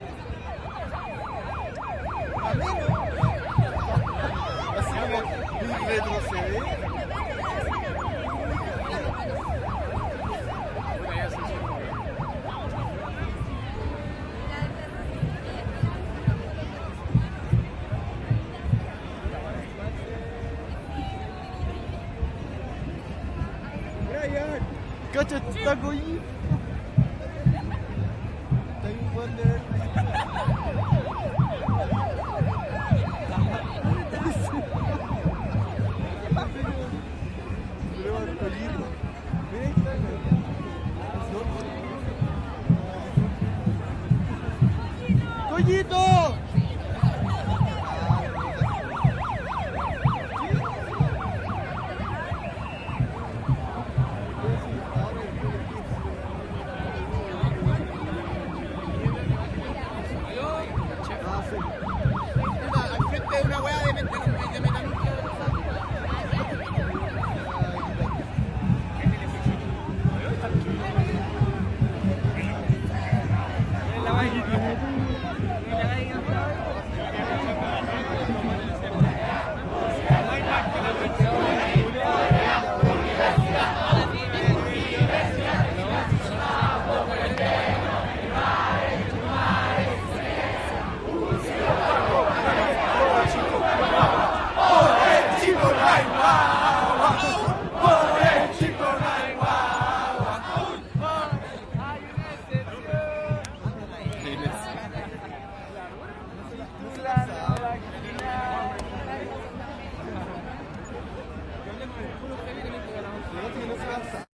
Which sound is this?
marcha estudiantes 30 junio 12 - sirena de megafono uni una
Desde baquedano hasta la moneda, marcha todo tipo de gente entre batucadas, conversaciones, gritos y cantos, en contra del gobierno y a favor de hermandades varias.
Diversos grupos presentan algún tipo de expresión en la calle, como bailes y coreografías musicales en las que se intercruzan muchos participantes.
Una sirena se pasea entre la gente que termina gritando uni una.
batucadas, carabineros, chile, conversaciones, cops, crowd, de, drums, estudiantes, march, marcha, murmullo, protest, protesta, santiago, sniff, tambores